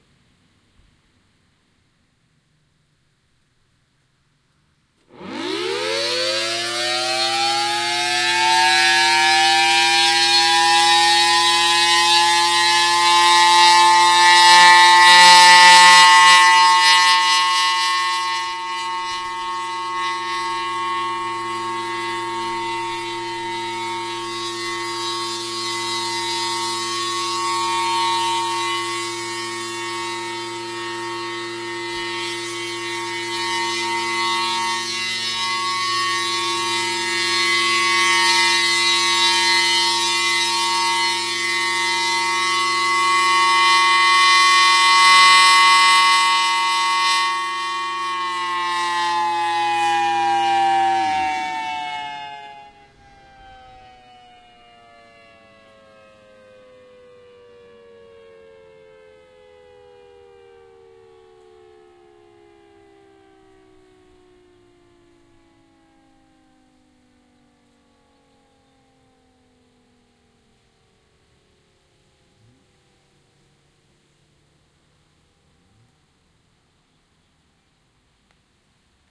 11-3-08 Maunalua Bay Thunderbolt 1000T(super-high)
Monday, November 3rd, 2008. 11:45am. Civil Defense monthly test of a Federal Signal 1000T (3-phase, 5/6 port ratio, "super-high" chopper voltage tap). This siren is located at the intersection of Keahole St. and Kalanianaole Hwy. I was at about 50ft away from it. Used an Edirol R-09(AGC off, LOW CUT on, MIC GAIN low, INPUT LEVEL 22-30) + Sound Professionals SP-TFB-2 Binaurals. Slight clipping: this siren was hard to record. You can also hear the supercharger pretty good as well. This 1000T is the one of the highest pitched ones on the island of Oahu. Sounds like it's gonna explode.
1000t, air, binaural, civil, defense, disaster, emergency, federal, hawaii, honolulu, outdoor, raid, signal, siren, thunderbolt, tornado